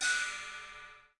cymb small2
a percussion sample from a recording session using Will Vinton's studio drum set.
crash, cymbal, hi, percussion, studio